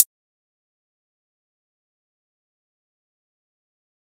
Diseñado en Maschine usando samples y sintetizadores digitales.
Designed in Maschine using samples and digital synths.
maschine, hat, percussion, drums, digital